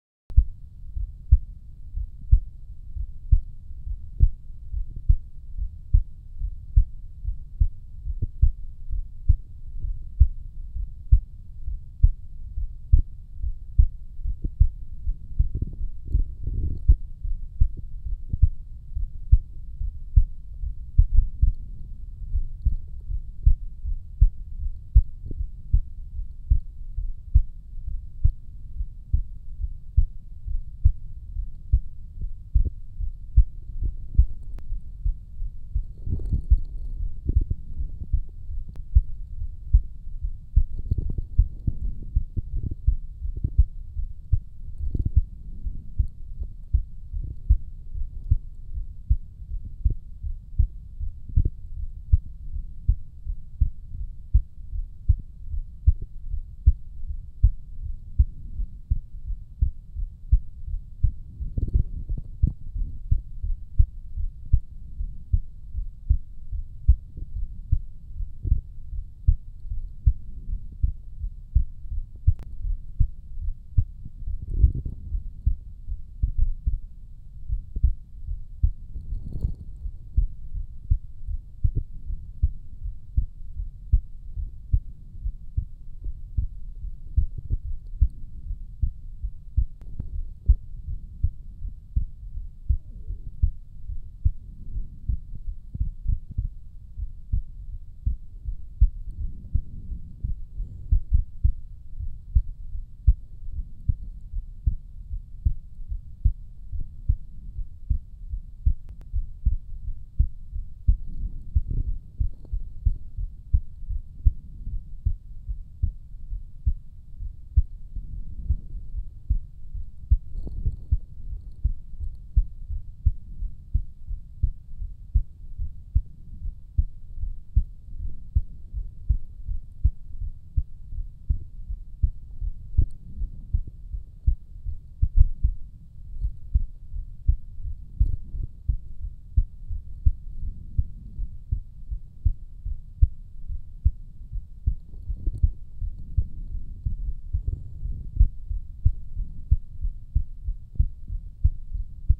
A short section of a near 17 minute recording of my heart at rest. I can upload the long version at request.